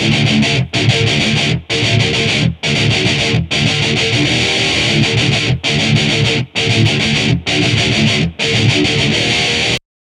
REV LOOPS METAL GUITAR 13
rythum guitar loops heave groove loops